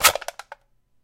aluminum can sliced with a knife
aluminum, can